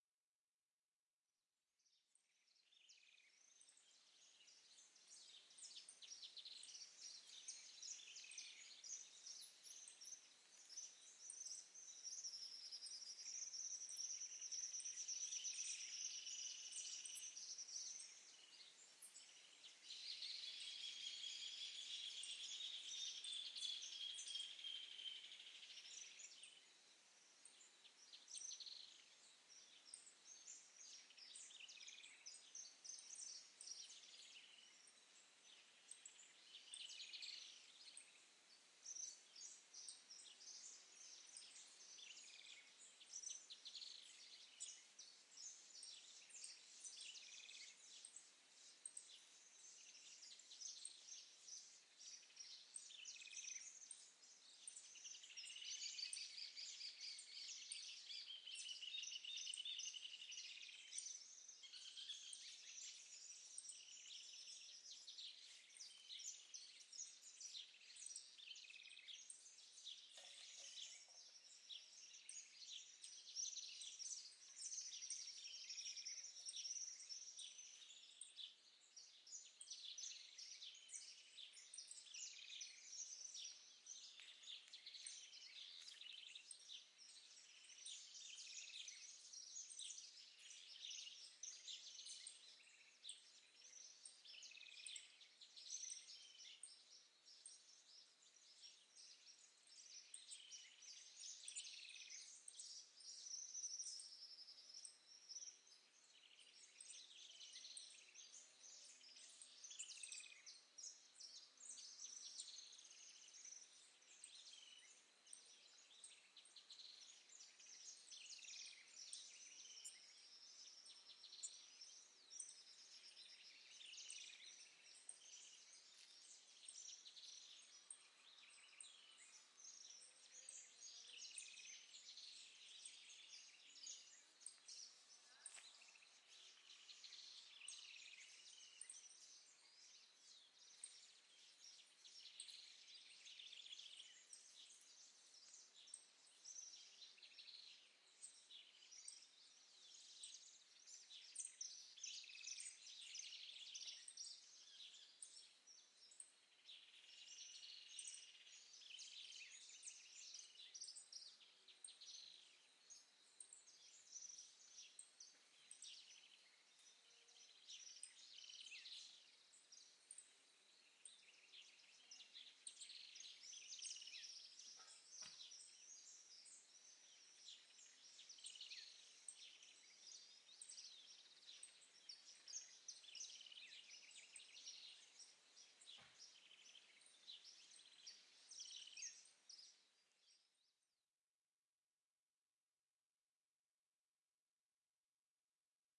My grandfather lived here for 34 years. My mom grew here.
The last summer i was with my laptop, soundcard focusrite and mic rode nt2, and i recorded this birds.
The place name is "Chancay" in Buenos Aires, Argentina.
My english is bad, sorry! Un abrazo!
ambient, birds, field